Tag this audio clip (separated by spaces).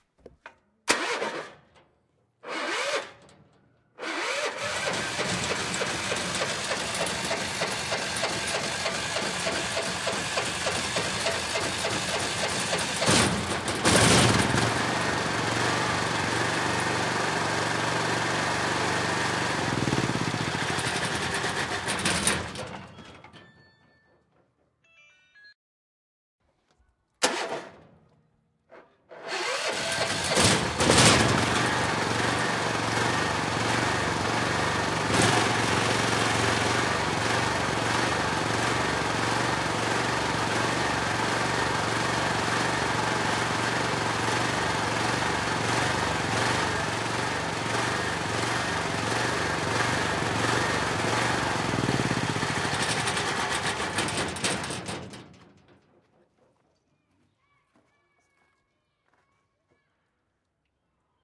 motor run start generator